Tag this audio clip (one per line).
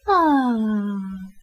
aw
coo
English
voice